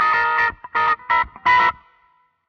a loop with my Ibanez guitar. Cooked in Logic.